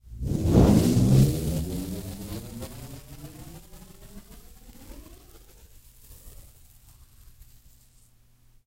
masking tape reversed
peeling tape off of a masking tape roll (reverse)
tape, reverse, MTC500-M002-s14, masking, manipulated